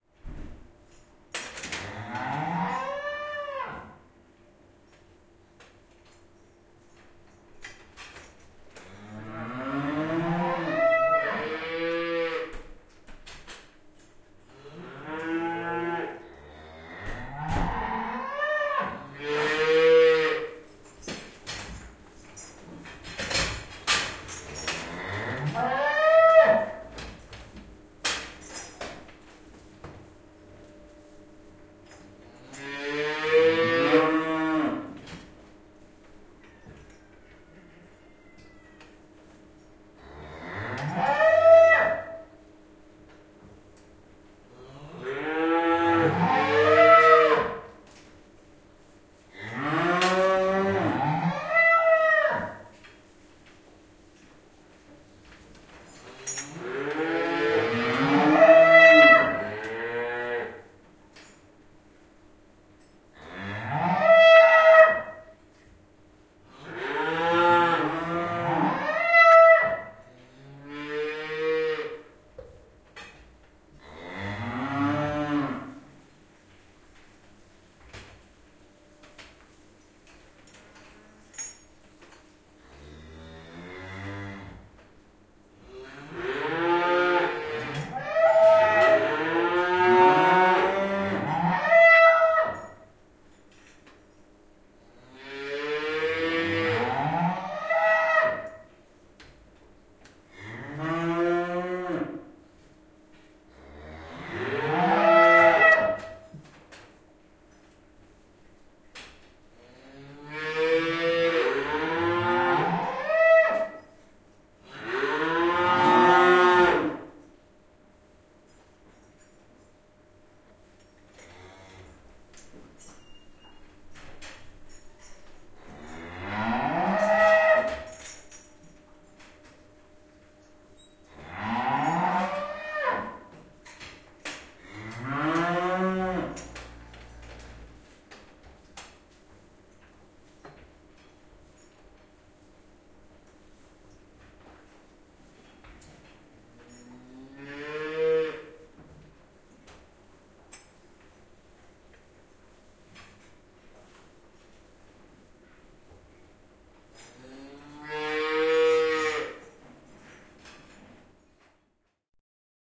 Lehmät ammuvat navetassa / Cows mooing in the barn
Lehmät ammuvat isohkossa navetassa, ketjujen kolinaa.
Paikka/Place: Suomi / Finland / Vihti, Leppärlä
Aika/Date: 18.05.1995
Animals, Cows, Suomi, Agriculture, Finland, Cattle, Maatalous, Soundfx, Yleisradio, Tehosteet, Finnish-Broadcasting-Company, Field-Recording, Karja, Yle, Domestic-Animals